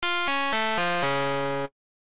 Game Over
Just a sound I made for a game jam. Just remember to post it down in the comments so I can see your great work!
8bit, arcade, retro